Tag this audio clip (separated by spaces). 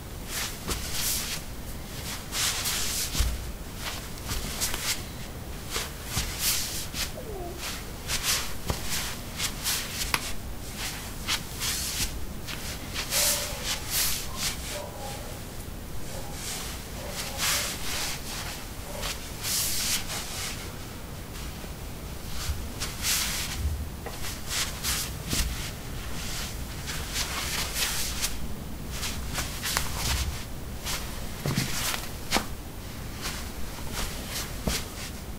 footstep footsteps steps